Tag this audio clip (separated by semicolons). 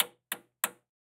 Machine; Real; Snap; Unit; Press; sfx; Mechanism; Digital; One-Shot; Click; Switch; Interface; Short; Radio; Knob; Off; Turn; Recording; Tech; Button; Rack; On; Acoustic